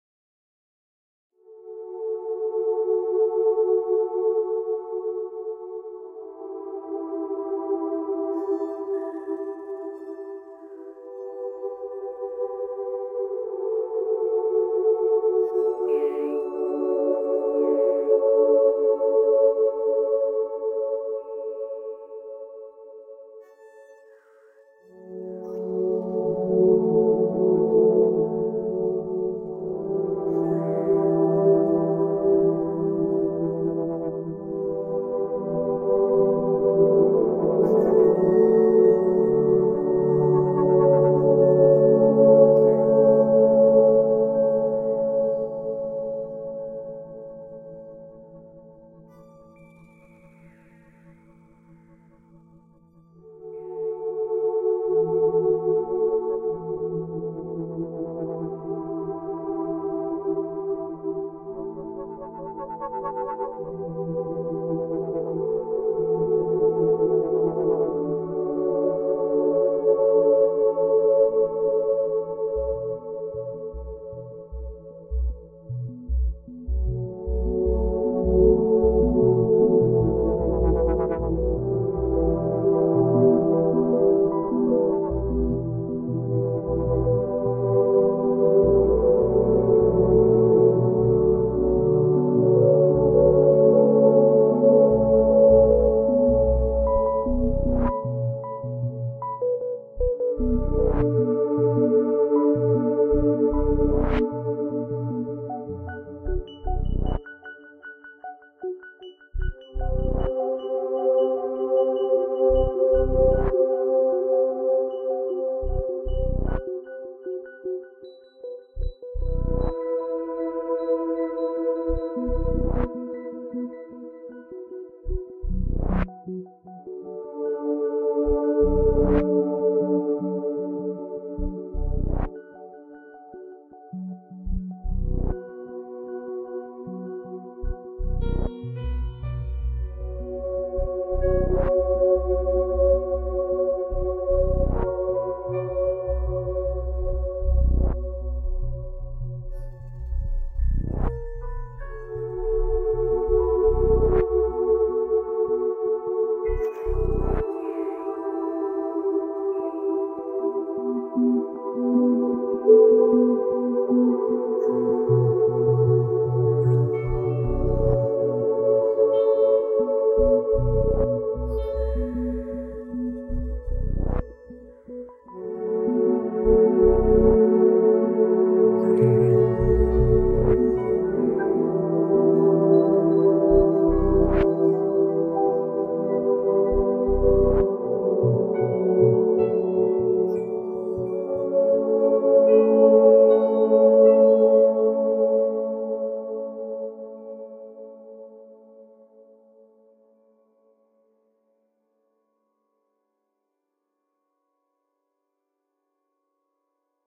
lost in love
Very dramatic "ethereal" moment.. maybe love!
Made with Reason!
cinematic, film, atmosfera, movie, dramatic, atmos